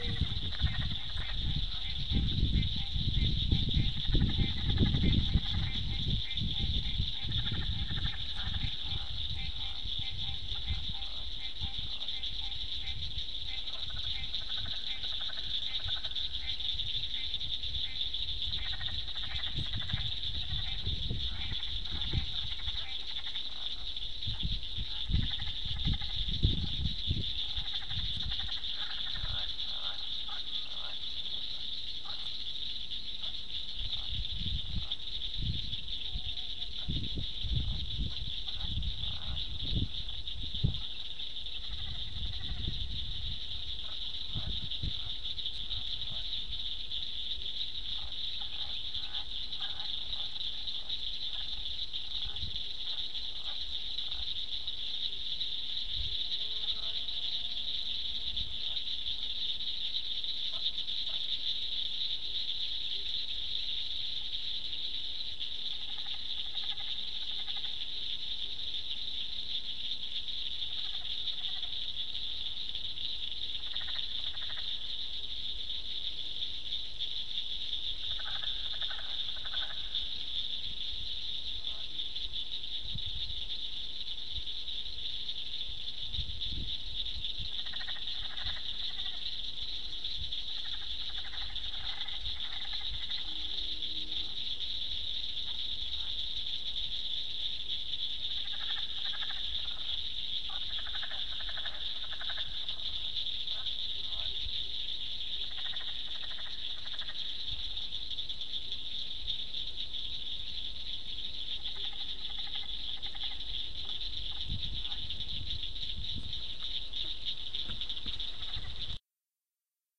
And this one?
This is another recording of the deep nature going on at a little pond at an Episcopal Retreat center near Navasota TX